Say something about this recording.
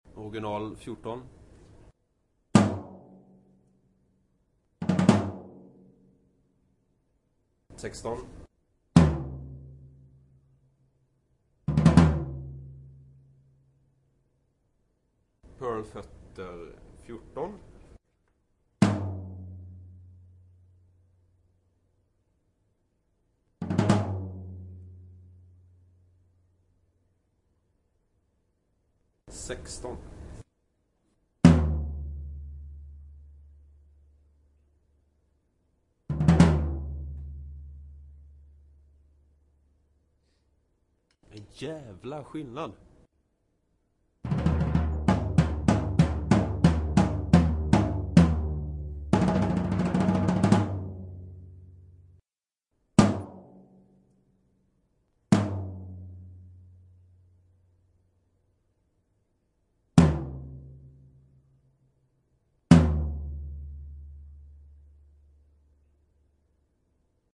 Gretsch floor toms 14 16 and replacing feet to Pearl
This is a recording of two Gretsch Catalina Maple floor toms, one 14 inches in diameter, one 16 inches. I replaced the original tom feet with Pearl air suspension feet, which gave a huge improvement in tone, resonance and sustain.
Recorded with a Sony ECM-DS70P Stereo Recording Microphone into an iRiver H340 mp3 player. Normalized in Sony Sound Forge.